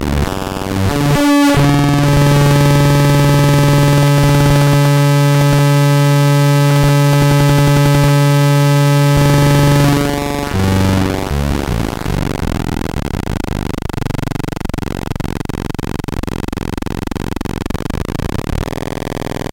APC-Tonalities
APC, Atari-Punk-Console, diy, drone, glitch, Lo-Fi, noise